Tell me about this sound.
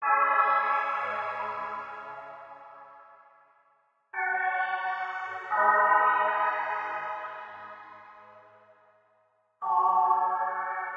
Synth Lead line with a sci-fi feel to it
drum-and-bass, lead, synth